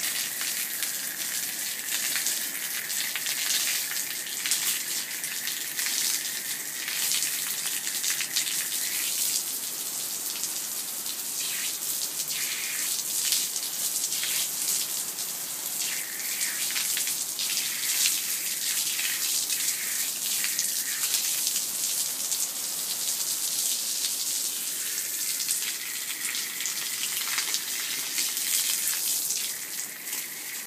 Waterhose-Water on pavement
Recording of a water hose and water falling on the pavement.
Recorded with an iPhone 4S and edited in Adobe Audition
stream, pavement, waterhose, splash, water